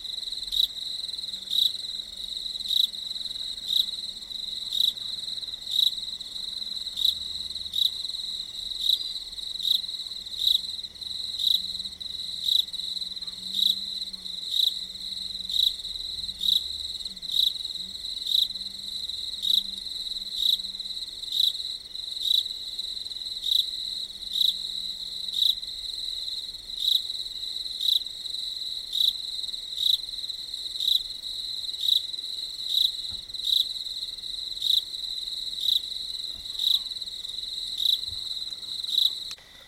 MerlothPark crickets1
Cricket concert at nightfall in Marloth Park near Crocodile River, South Africa. Marantz PMD751, Vivanco EM35.
cricket, savanna